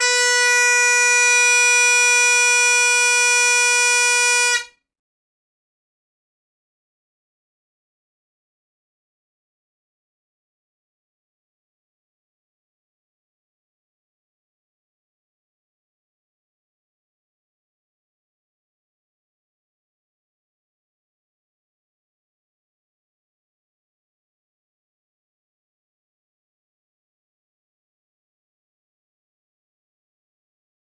Bagpipe Chanter - Low A
Great Highland bagpipe chanter, Low A note.
Highland, Pipes, Bagpipe, Drones, Chanter